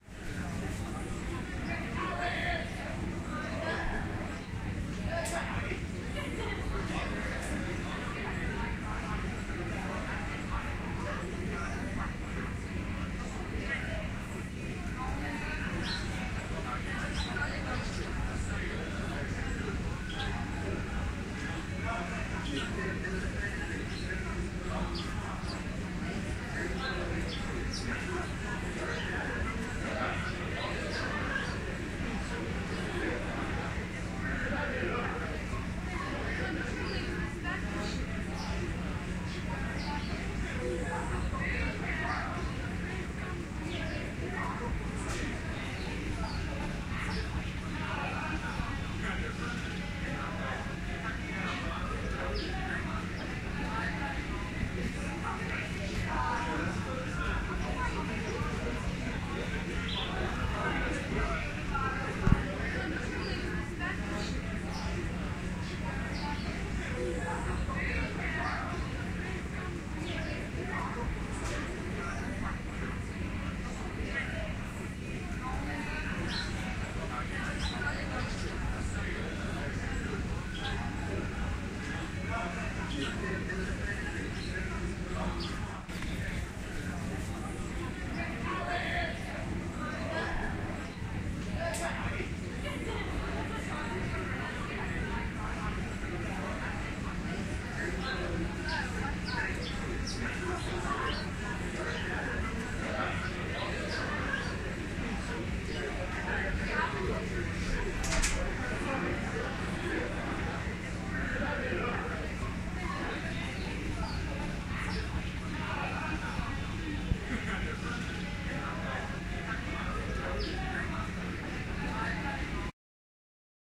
College Ambiance
Lunch break ambiance at a college campus: Various muffled conversations, movement. Heavy crowd noise. Recorded with Zoom H4n recorder on an afternoon in Centurion South Africa, and was recorded as part of a Sound Design project for College. Was recorded on college campus during a lunch break.
ambiance, ambience, ambient, atmo, atmos, atmosphere, background, background-sound, campus, college, Lunch, owi